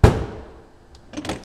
mono field recording made using a homemade mic
in a machine shop, sounds like filename--moving the trash bin around